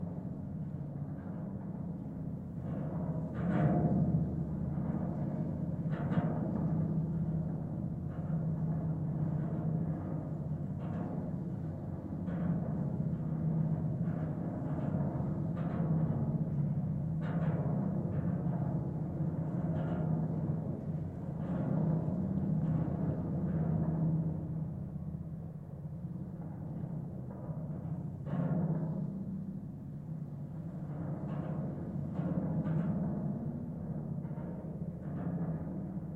GGB 0314 suspender NE21SW
Contact mic recording of the Golden Gate Bridge in San Francisco, CA, USA at NE suspender cluster 21, SW cable. Recorded February 26, 2011 using a Sony PCM-D50 recorder with Schertler DYN-E-SET wired mic attached to the cable with putty. Near the north tower, sound is dampened and has less cable, more vehicular noise.
bridge, cable, contact, contact-mic, contact-microphone, DYN-E-SET, field-recording, Golden-Gate-Bridge, Marin-County, mic, PCM-D50, San-Francisco, Schertler, Sony, steel, wikiGong